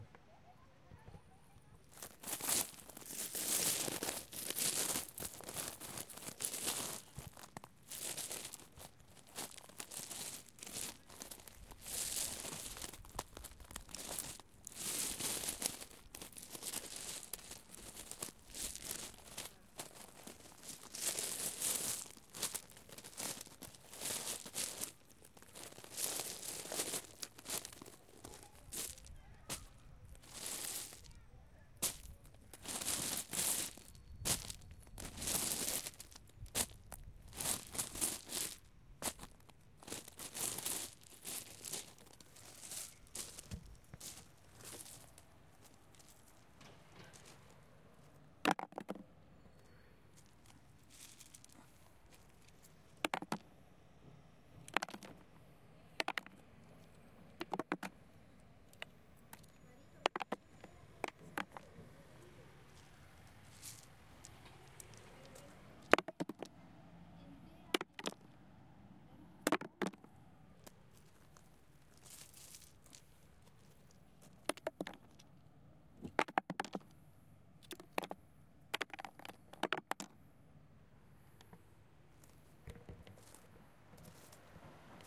Recorded at TEA, a museum in Santa Cruz de Tenerife. I was invited by Dancer Masu Fajardo to create some sound ambients for a dance piece she was doing there, we decided to use only the sounds being created by her body and her interactions with the space.
Here are the sounds of Masu stepping at gravel and stone garten ate the museum. You can also hera the sound of stones being thrown at an empty wooden log.
Recorded with a ZOOM H4 N